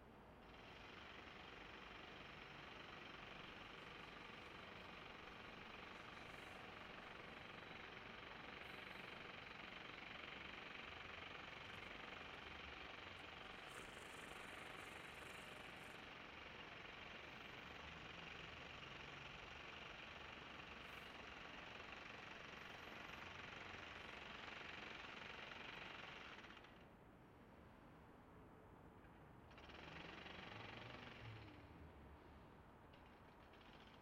Jackhammer, distant
construction-site, hammer, demolition, power-tools, drilling, tools, site, concrete, constructing, jack-hammer, pneumatic, construction, power-tool, demolish